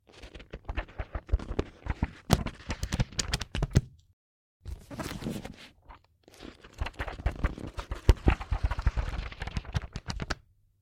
phone book fast filpping
Flipping through a phone book.
Recorded with H5 Zoom with NTG-3 mic.
flipping, pages, phone